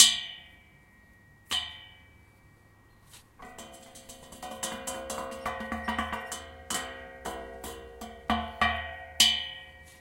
Handrail being hit MORPHAGENE
me hitting a handrail with ... my hand ...
ambient, metal, morphagene